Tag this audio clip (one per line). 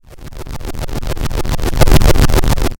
8bit
helicopter
videogame